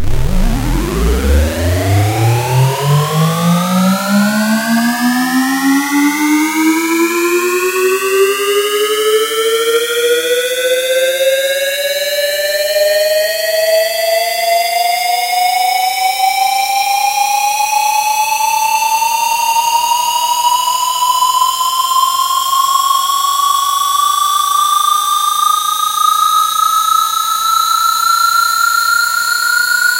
Or was it a triangle? Processed in cool edit.